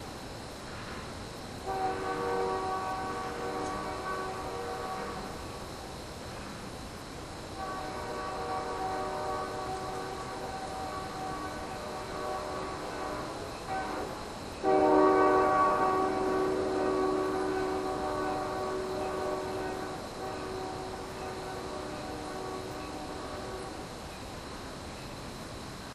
A train passing in the distance.